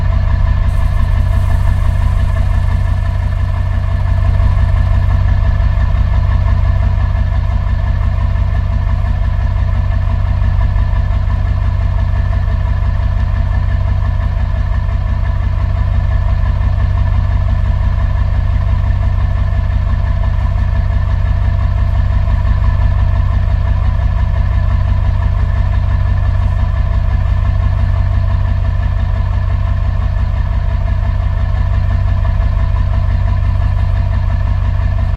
school bus truck ext idle engine close1
truck, idle, bus, ext, engine, close, school